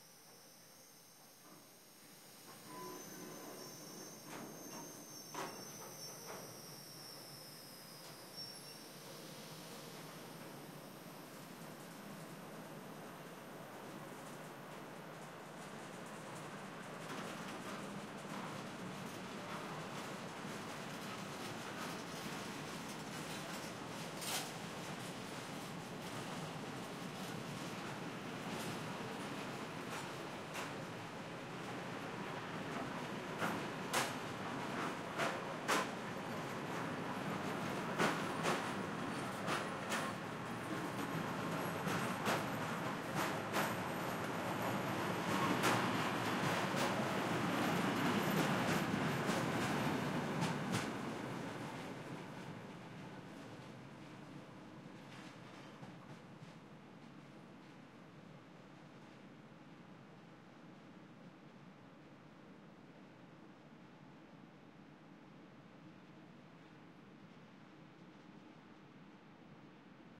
Train passing by at a station in Morocco
railway, Train, transport